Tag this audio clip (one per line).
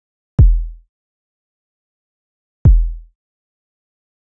shot
one
sample
Drum